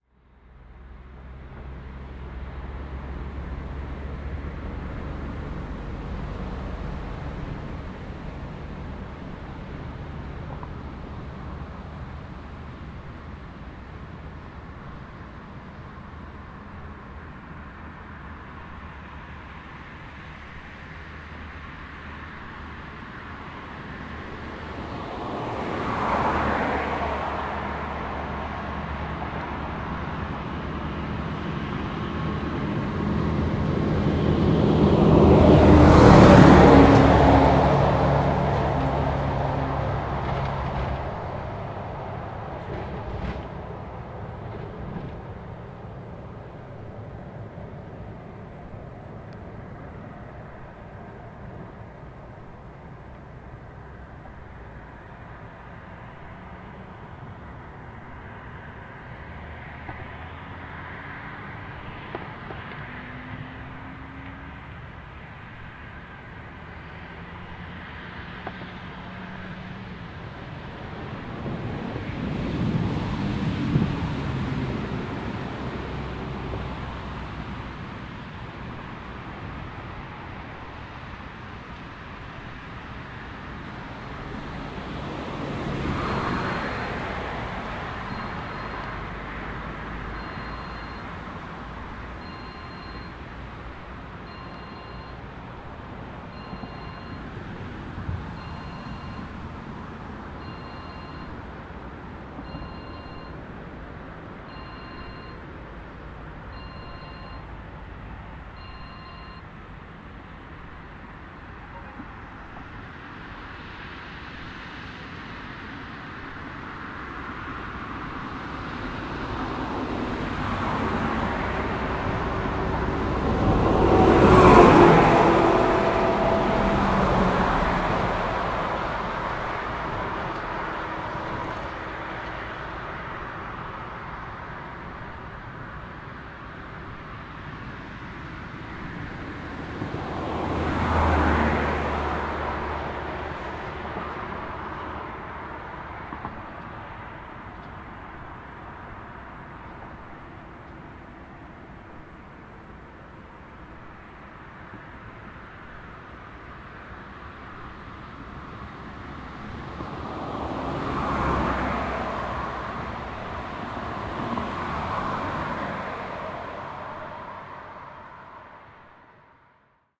23.07.12 cricets and cars
23.97.2012: recorded at night near of Warta river in Poznań (Poland). Intense sounds of passing by cars, trucks and tramways mixed with thecrickets music. Recorder - zoom h4n (internal mikes).
car, cricets, grasshopper, meadow, noise, park, Poznan, road, street, tramway, truck